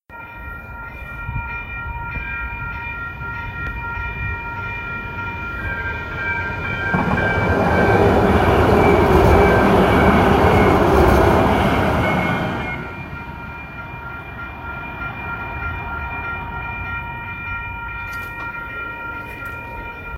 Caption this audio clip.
Light Rail Train Passing
VTA Light Rail crossing. Crossing Guard bell chiming loudly, and Doppler effect of train passing.
Recorded on a Samsung S8.
alarm, bell, Crossing, guard, rail, Train, Trains